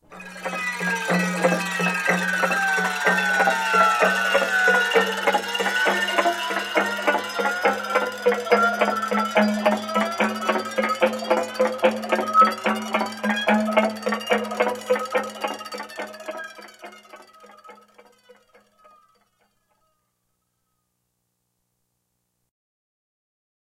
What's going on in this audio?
Another cool sound made on an inexpensive Fender Telecaster, a GK-2 pickup and a Roland GR-33 Guitar Synth.
Lots of tension.
GR-33, guitar, MIDI-guitar, Roland, synth, Tension